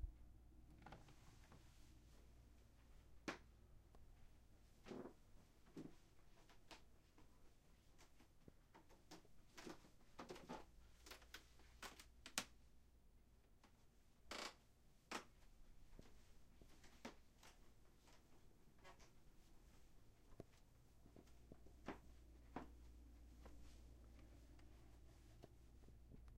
Just a 30 second clip of myself walking around on an old wood floor in a Bed and Breakfast.